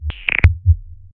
bc8philter10
various bleeps, bloops, and crackles created with the chimera bc8 mini synth filtered through an alesis philtre
bloop, synth, alesis-philtre, crackle, chimera-bc8